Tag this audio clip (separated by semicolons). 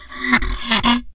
daxophone; friction; instrument; wood